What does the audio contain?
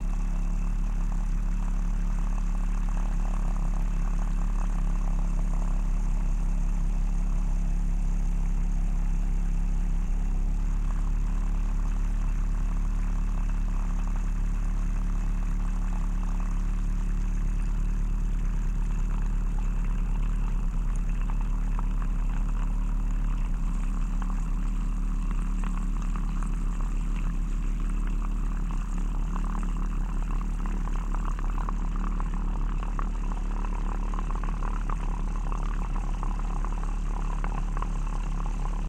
a small electric milk frother in action.
2x piezo-> TC SK48.
small, frother, coffee, milk, piezo, electric